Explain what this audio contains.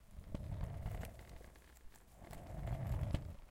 Roller Skates
Foley, OWI, Sound